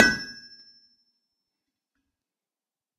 Anvil - Lokomo A 100 kg - Forging extremely hot steel 1 time
Forging white glow hot steel on a Lokomo A 100 kg anvil once with a hammer.
1bar, 80bpm, anvil, blacksmith, crafts, extremely-hot, forging, hot-steel, impact, iron, labor, lokomo, metallic, metal-on-metal, metalwork, smithy, steel, tools, white-glow, work